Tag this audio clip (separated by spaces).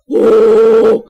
exclamation scare jump speak english startled scared female talk voice woman